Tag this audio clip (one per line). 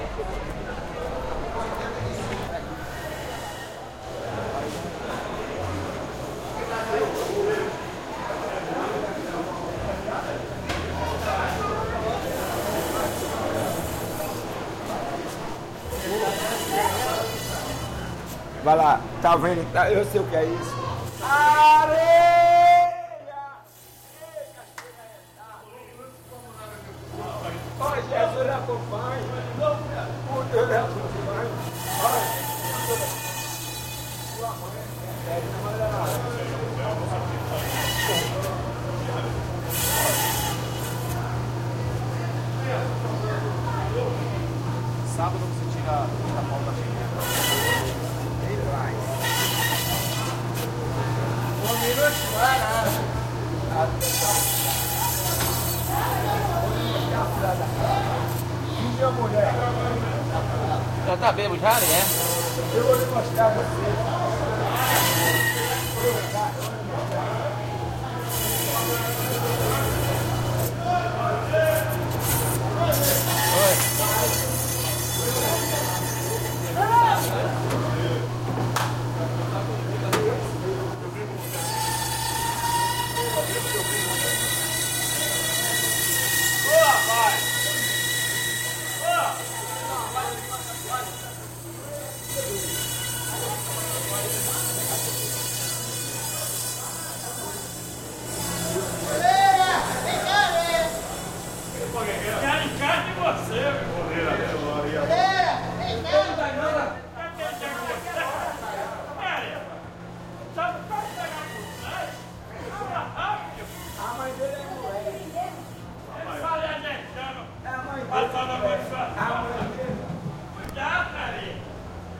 brazil
free
people
field-recording
brasil
cachoeira
market